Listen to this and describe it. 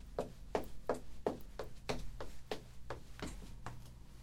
The sound of someone walking on wood